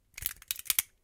Same thing as Metal Flick 1 really
Recording of me fiddling with my Metal Spud Gun. It makes for some really interesting metal flickety noises. Check out my other sounds for the rest of these metal clicks and flicks!
Recorded with Zoom Q4 Camera Mic